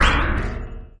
A short electronic spacy effect lasting exactly 1 second. Created with Metaphysical Function from Native
Instruments. Further edited using Cubase SX and mastered using Wavelab.